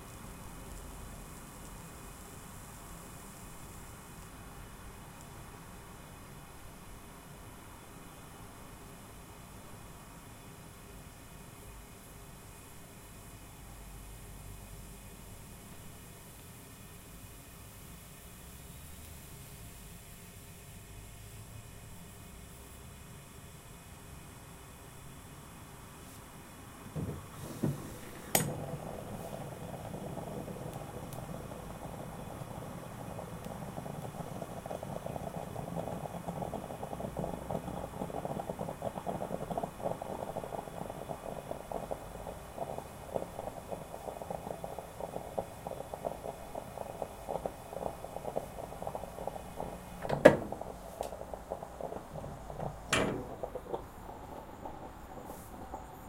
morning coffee
raw sound
moka coffee maker
busy traffic through open window
recorded with zoomh4n
coffee, appliance, kitchen